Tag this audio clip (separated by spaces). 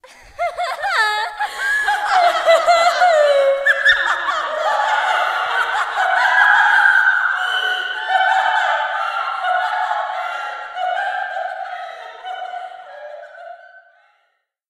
cackle evil female giggle laugh laughing laughter woman